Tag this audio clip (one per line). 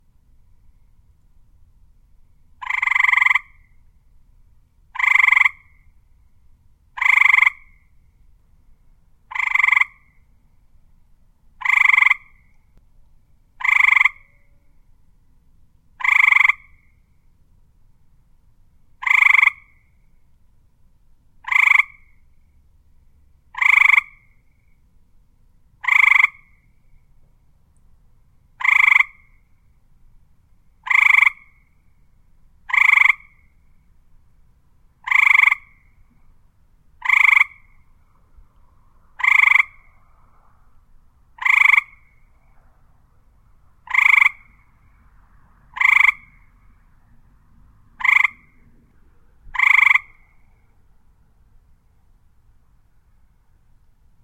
ambient
croak
croaking
field-recording
frog
nature
toad